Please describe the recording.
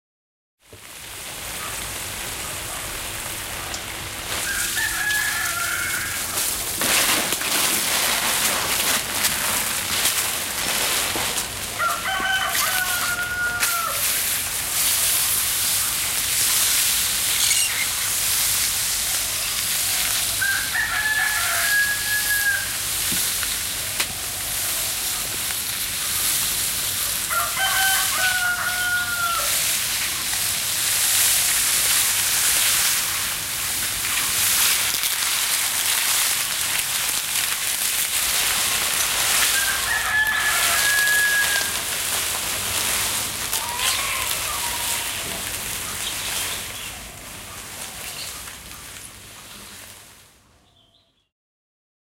Watering plants with a hose pipe.